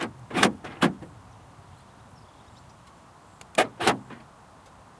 Car doors locking/unlocking
Automatic car doors locking and unlocking using the remote.
remote, unlocking, car, automatic, door, locking